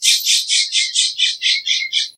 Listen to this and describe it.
brd blujay
A Blue Jay Cry mono
bird, birds, birdsong, call, field-recording, hawk, nature, song